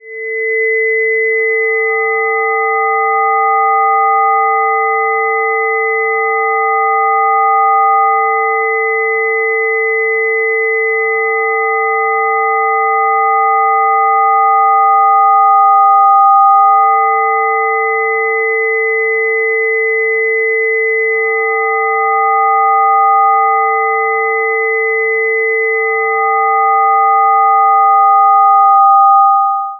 Plutone - Pure Data Farnell
made with pure data, start by example of Farnell
sci-fi, fx, effect, sound